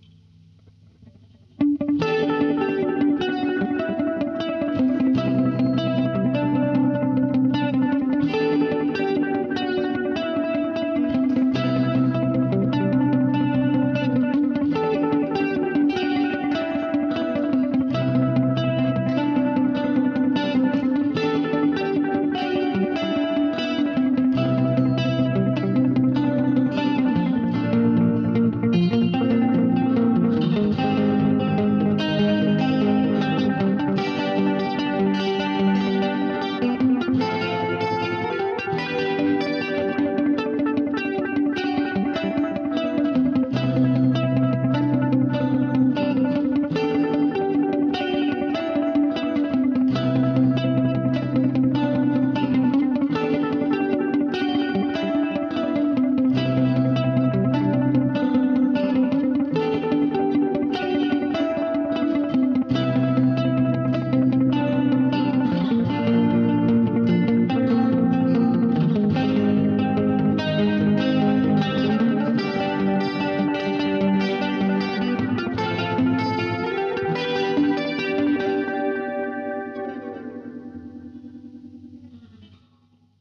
This is electric guitar sketch. Recorded with Cubase using delay and tremolo guitar effects.
130-bpm
size: 4\4
tonality: Em
130-bpm, chord, delay, echo, electric-guitar, guitar, guitar-loop, guitar-riff, instrumental, music, quickly, riff, rock, trem, tremolo